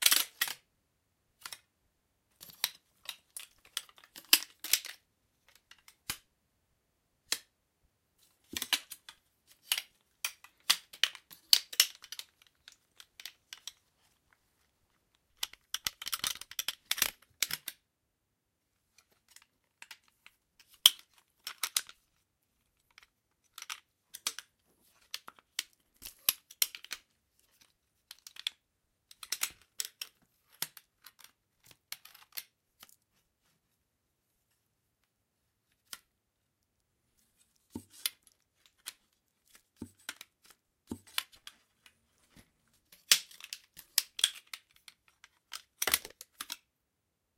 white cane 3
I am assembling and Disassembling my white cane. It consists of five little ovens which needs to be put together when I want to use it.
assembling, blind, oven, white-cane